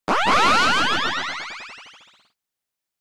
Drones Rising
Alien, space, game